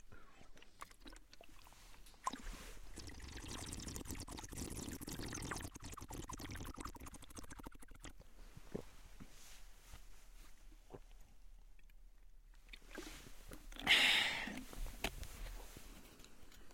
male slurping water
Male slurping some water. Recorded in the anechoic chamber at the USMT.
male, slurping, anechoic-chamber